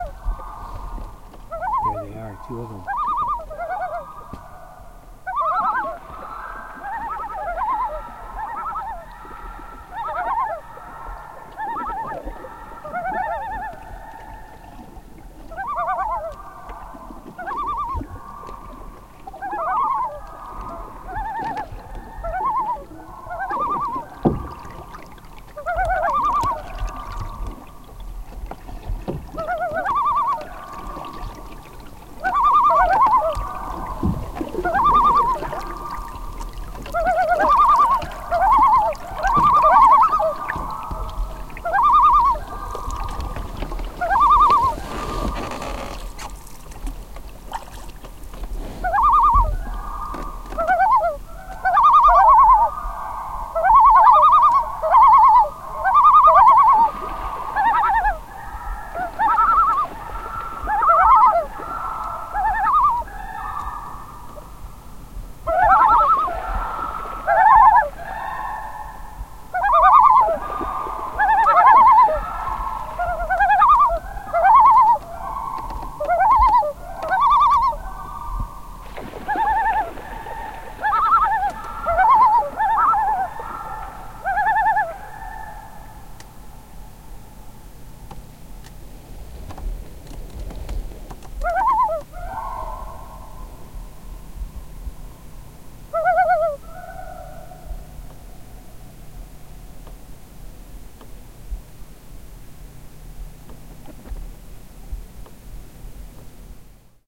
canadian loons
Recorded from a canoe on a lake near Smithers, British Columbia. Zoom H4N recorder, on board stereo mic. Volume raised on clip.